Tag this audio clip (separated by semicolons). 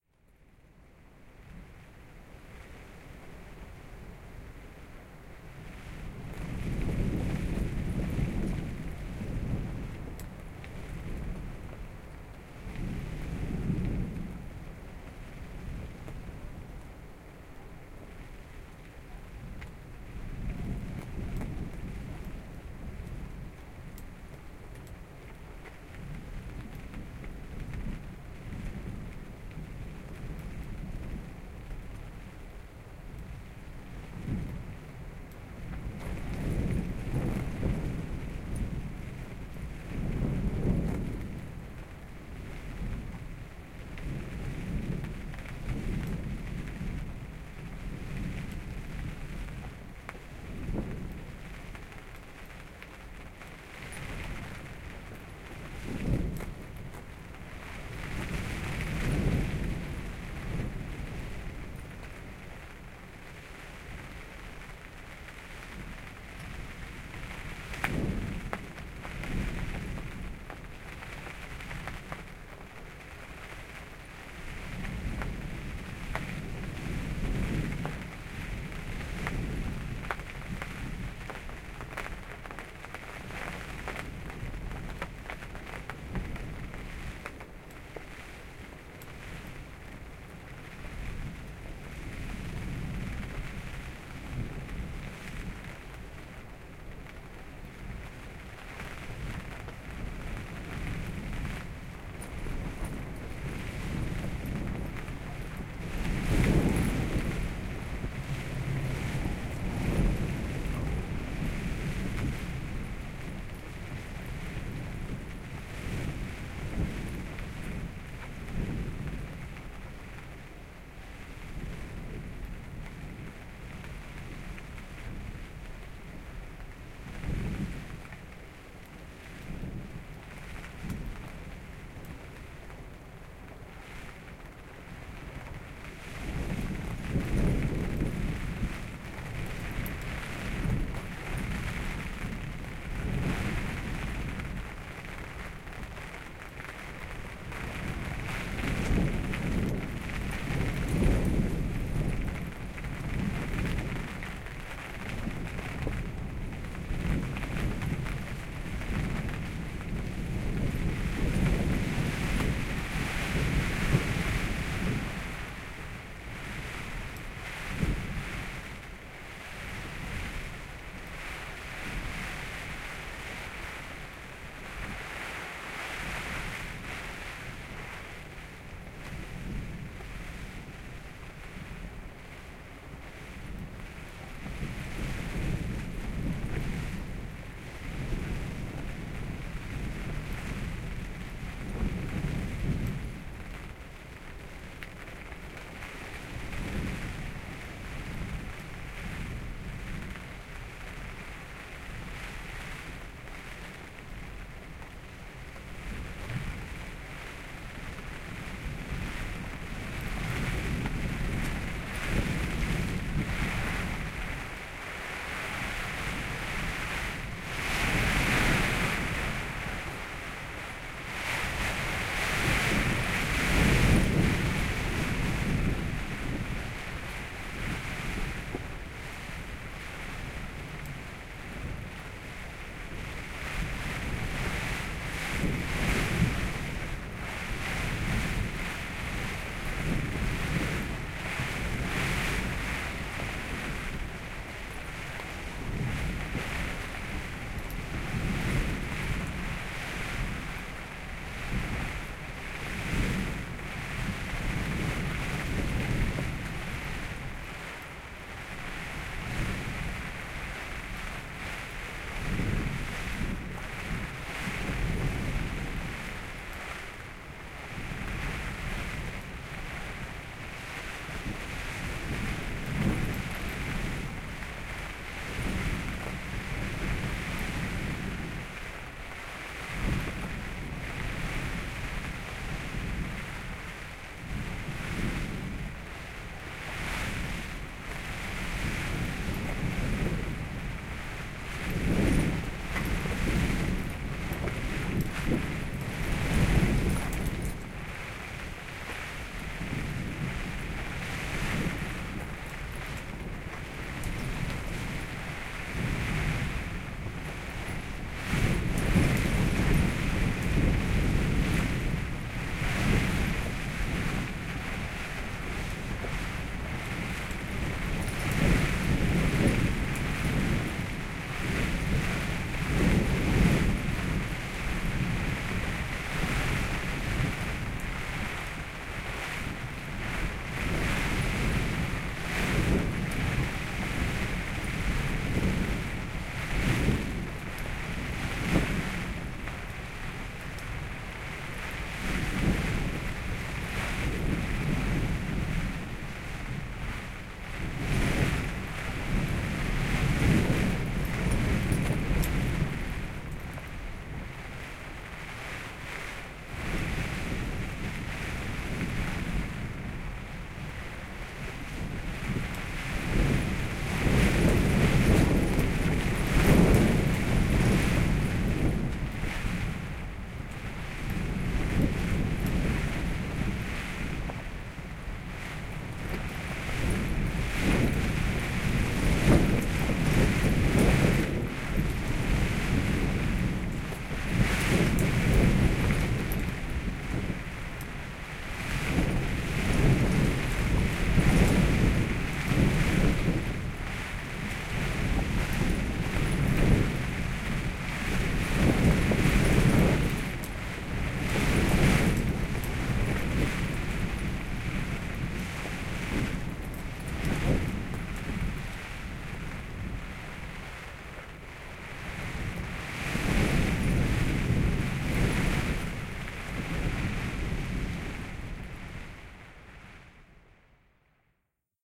canvas; field-recording; gale; rain; squall; storm; tent; weather; wind